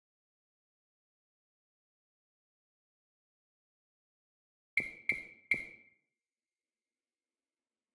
starting switch of a flourescent lamp sampled with a small electret microphone on a minidisc recorder.
bing, click, fluorescent, initial, lamp, ping, relais, starting, strobe, switch, tick